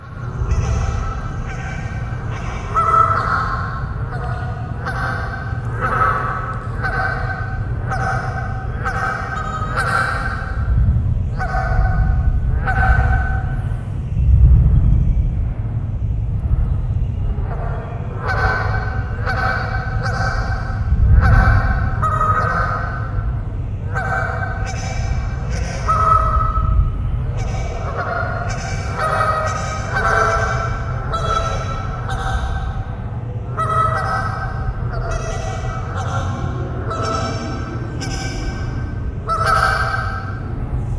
Barking Geese echo
goose, honking, honk, pond, quack, geese